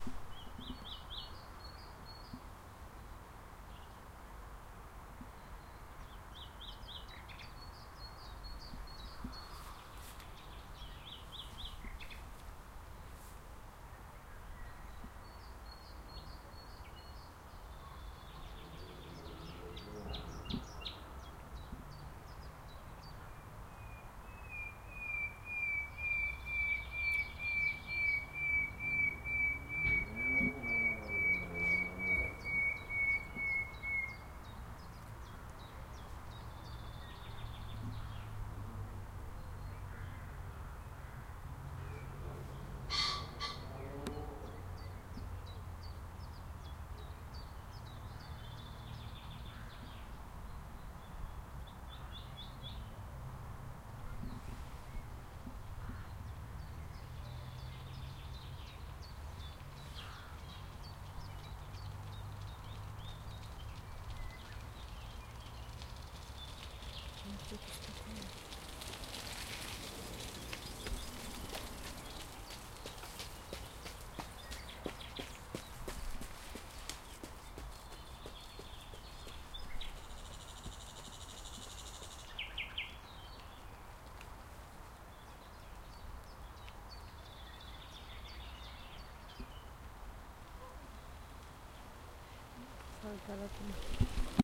This is a nightingale which singing near the river. In the background we can hear other birds.